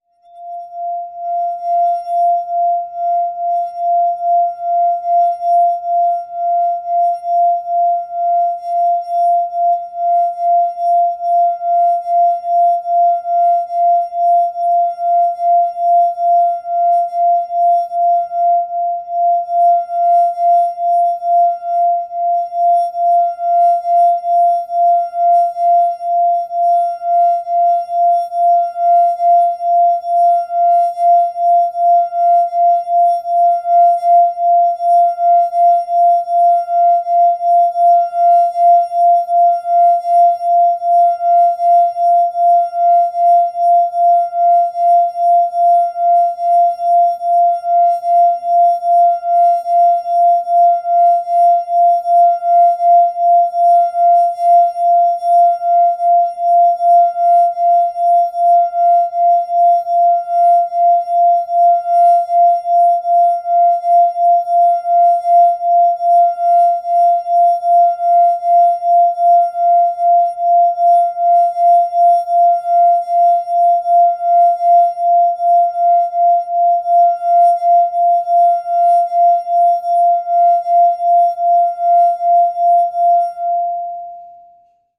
water wineglass4
Rubbing finger on wine glass filled with water. Recorded using mono microphone and ensemble. No post processing
00-M002-s14, rim, rub, water, wineglass